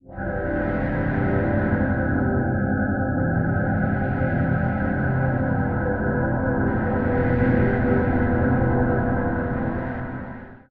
Layered pads for your sampler.Ambient, lounge, downbeat, electronica, chillout.Tempo aprox :90 bpm